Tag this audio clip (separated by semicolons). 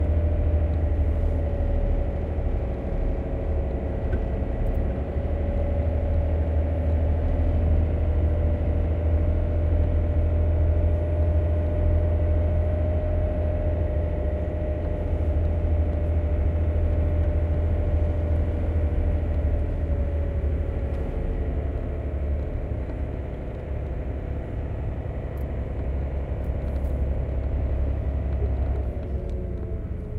digging; gears; transport; cabin; truck; transportation; inside; volvo; sand; loader; lorry